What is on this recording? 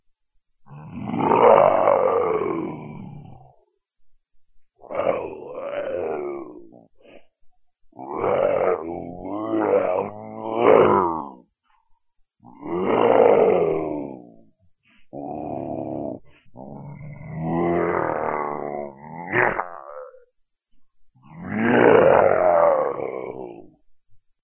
feu venere01
angry, cartoon, zombie, dinosaur, running, monster, loud